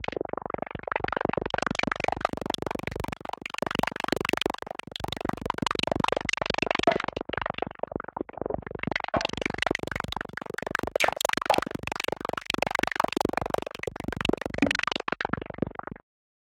fizzy underwater break
abstract, digital, glitch, noise, sound-design